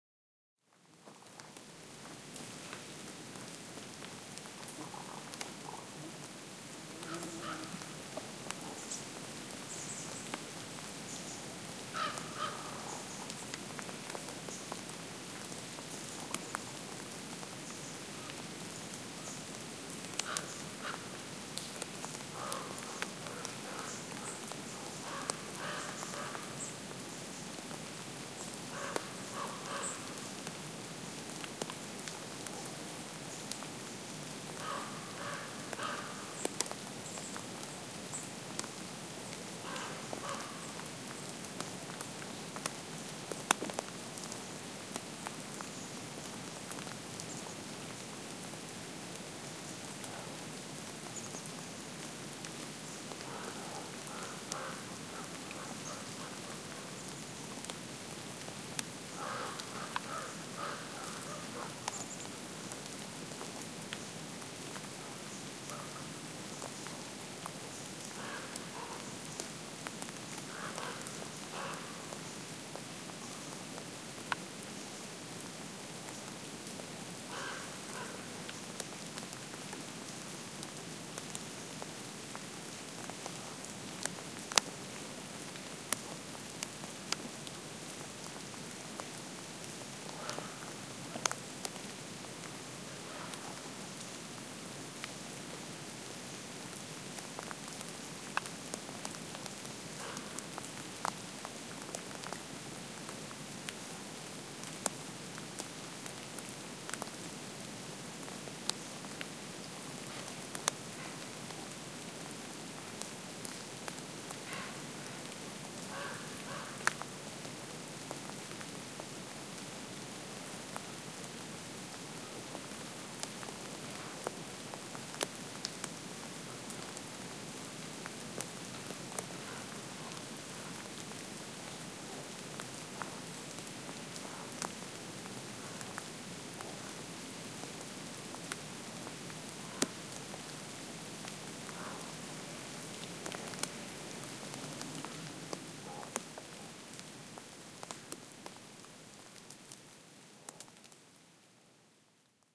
Redwood Forest After Rain, Humboldt County, California